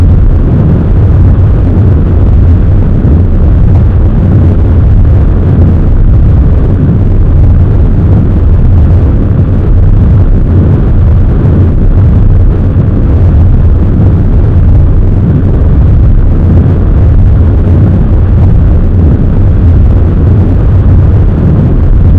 thrusters loopamplified
An amplified version of thrusters_loop for a more intense effect. Has some nice rumbling and stereo sound. Created in FL studio with two white noise components, both with a low pass filter and one with an overdrive plugin. Edited in audacity.
blast, blastoff, FL, loop, noise, off, rocket, space, stereo, synth, thrusters, white